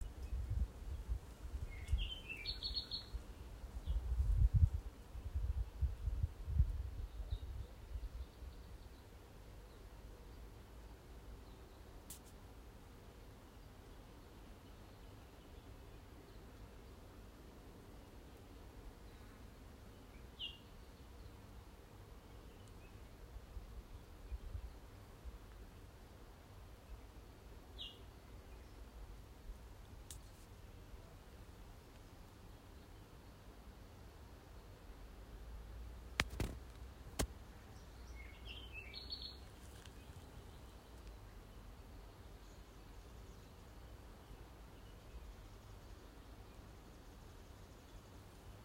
Recorded: February 2022
Location: Volcanoes National Park, Hawaii
Content: Bird calls and songs in rainforest